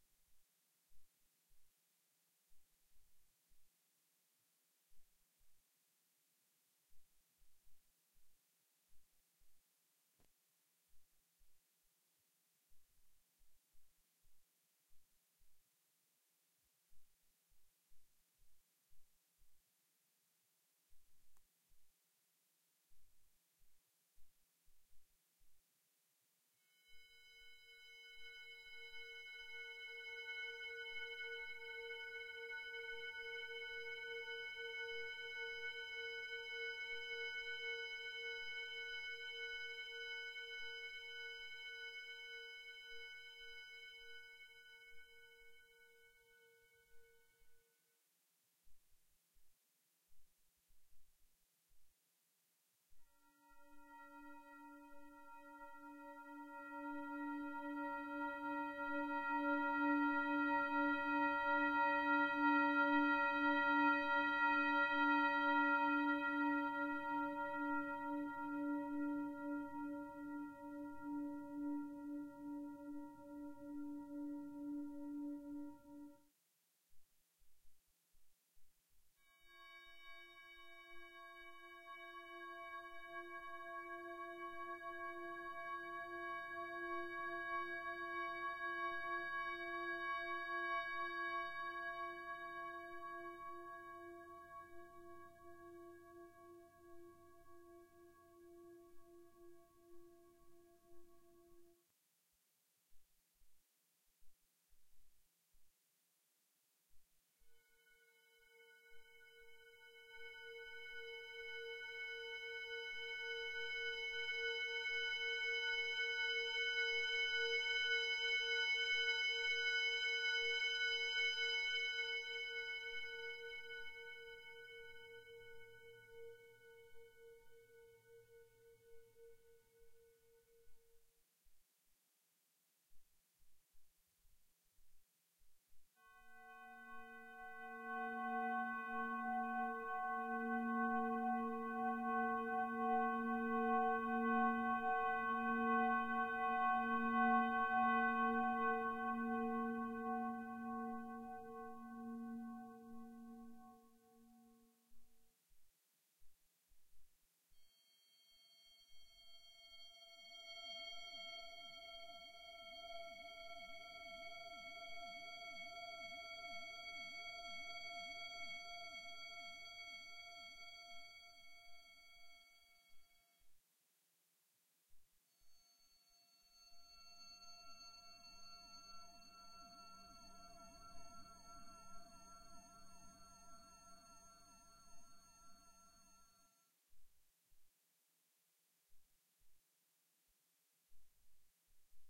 C#7(b9) drone
melody, phrase